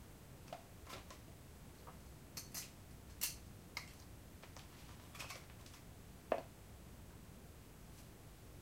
taking-eyeglasses-off-spectacle-case-quiet-closing-case
I´m taking some eyeglasses out off a spectacle-case, afterwards quiet closing of case
clothing-and-accessories, eyeglasses, spectacle-case